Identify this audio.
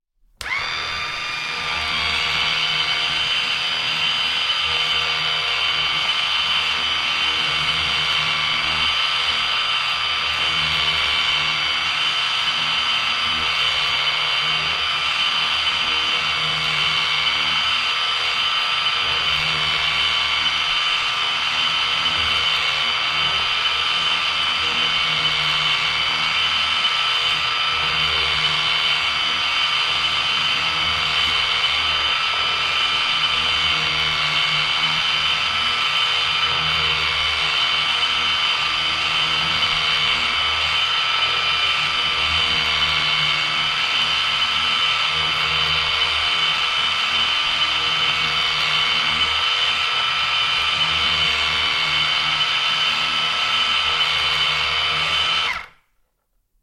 toy engine on run off 01
The engine from a toy is turned on, it runs and is turned off.
Recorded with the Fostex FR-2LE recorder and the Rode NTG3 microphone.
fr-2le, turn, rode, motor, ntg3, toy, power, run, off